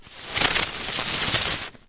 A buzzing be type of firecracker spinning a little for a second and then dies out.
fizz, firework, buzzing, spinning, bee, fuse, firecracker, stereo